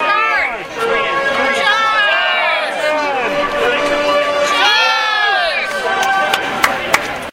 Fanfare then crowd yells charge.